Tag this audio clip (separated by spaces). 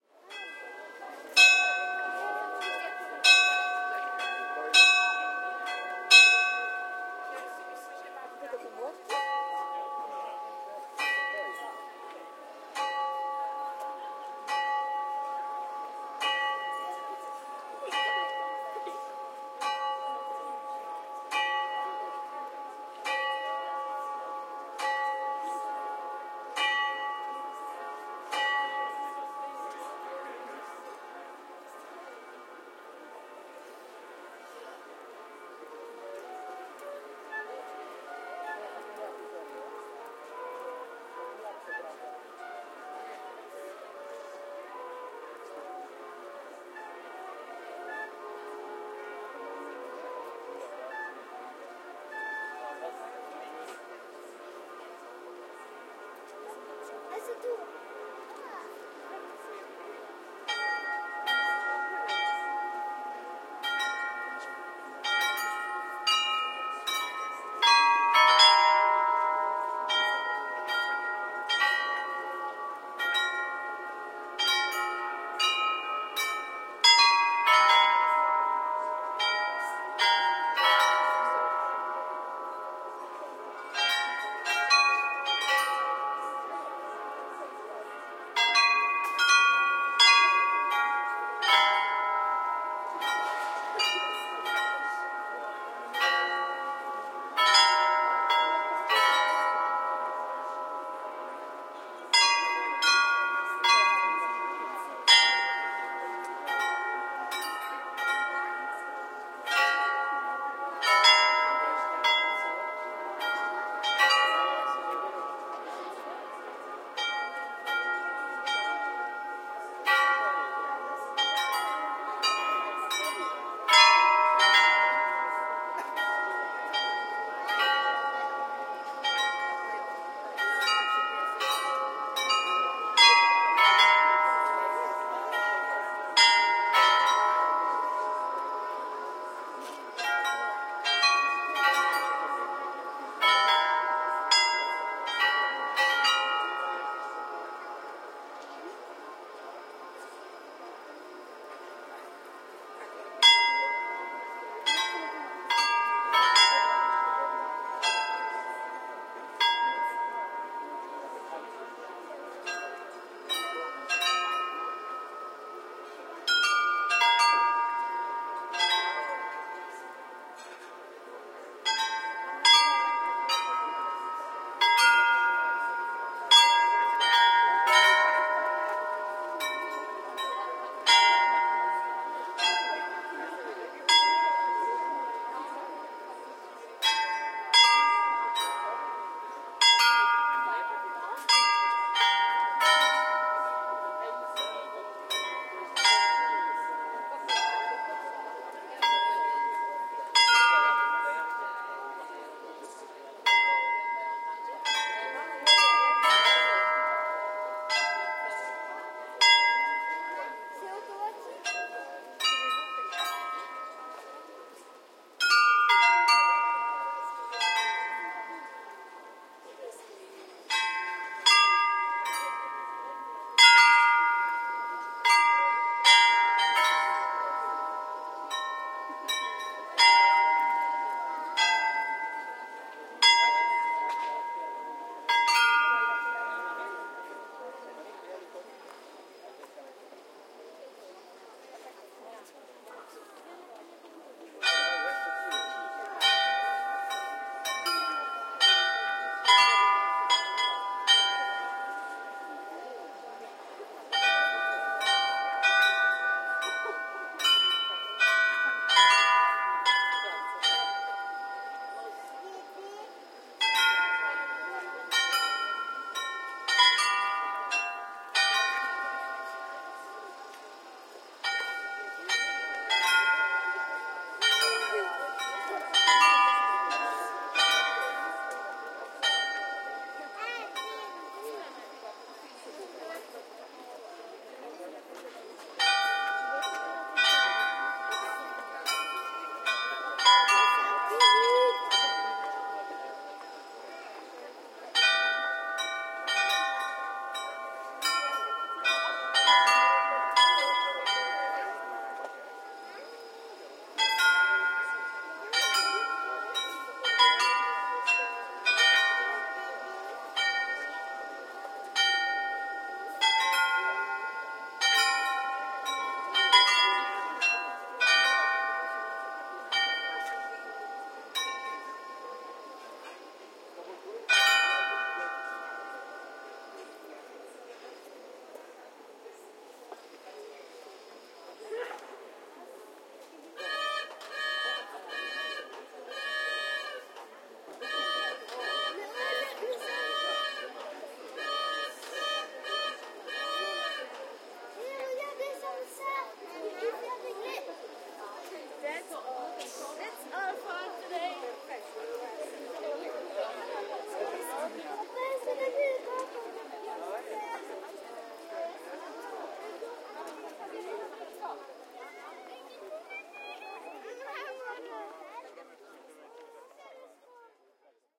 astronomical clock bells noon chime